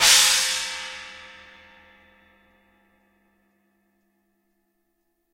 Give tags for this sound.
Bing Chinese Japan Gong Mallet asia Sound Cymbal Drumstick

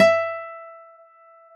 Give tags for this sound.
1-shot acoustic

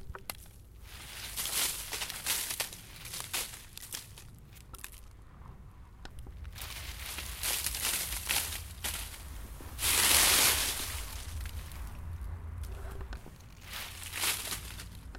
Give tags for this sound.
frost snow leaves walk footstep ice foot step winter running

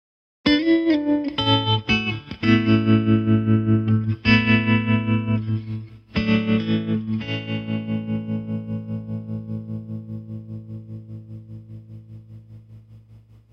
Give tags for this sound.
b country guitar r twang

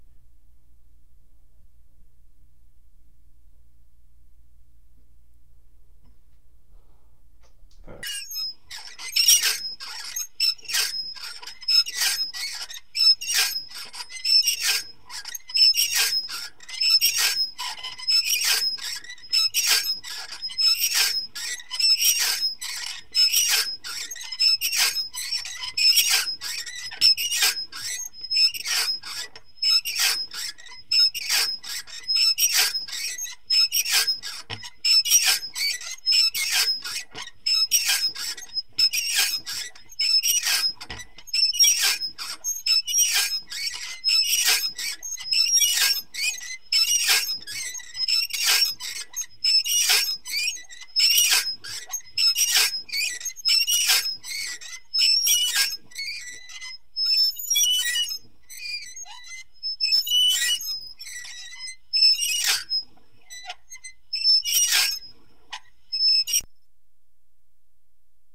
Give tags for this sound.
416 dat metal mono recorded squeaking toy using

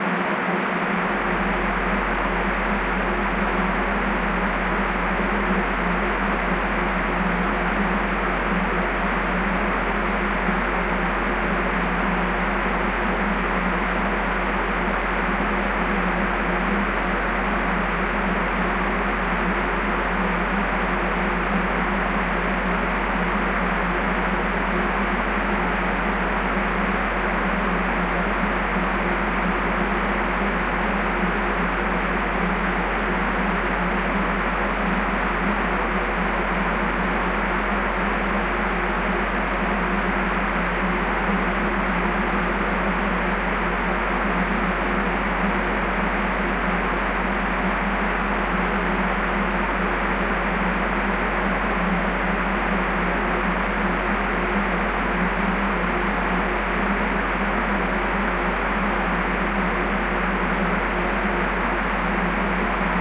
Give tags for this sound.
ambiance ambience ambient atmo atmosphere background background-sound drone noise soundscape white-noise

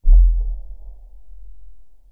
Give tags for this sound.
bass boom dinosaur footstep monster subsonic

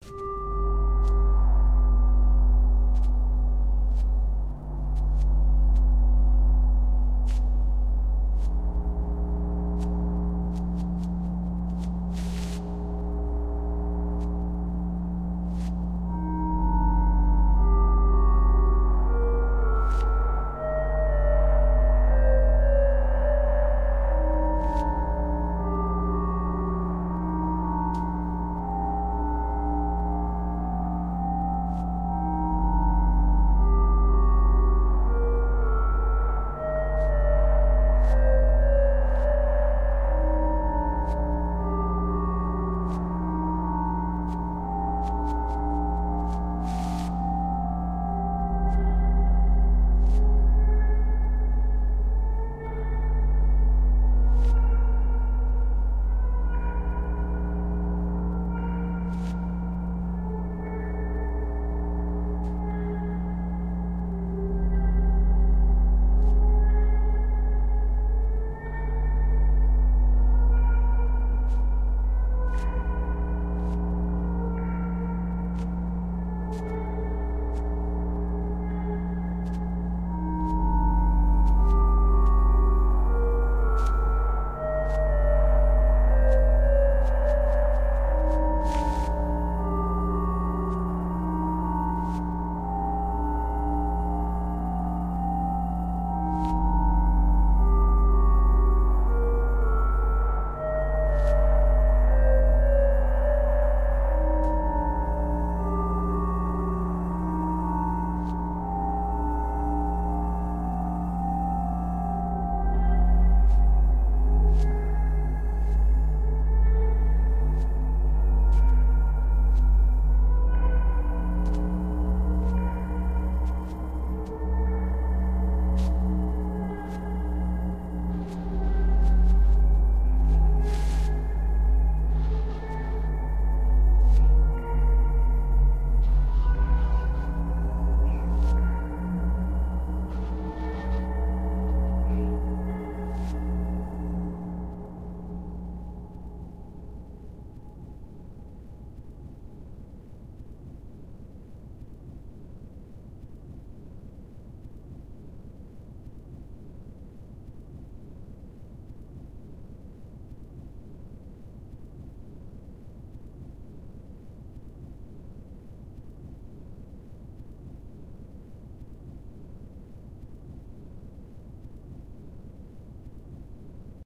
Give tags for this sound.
Creepy Drone Horror

